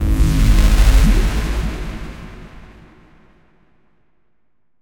ufo-explosion-2
Sounds used in the game "Unknown Invaders".
galaxy, game, gun, ship, space, ufo